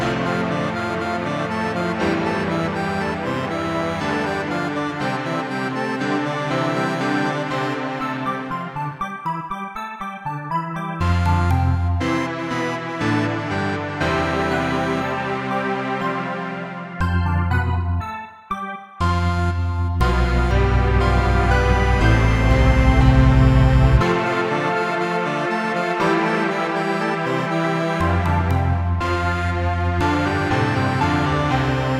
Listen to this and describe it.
Short loops 10 03 2015 2
made in ableton live 9 lite
- vst plugins : OddlyOrgan, Balthor,Sonatina choir 1&2,Strings,Osiris6,Korg poly800/7 - All free VST Instruments from vstplanet !
- midi instrument ; novation launchkey 49 midi keyboard
you may also alter/reverse/adjust whatever in any editor
gameloop game music loop games organ sound melody tune synth piano